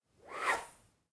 foley for my final assignment, a proyectile
bullet, proyectile, foley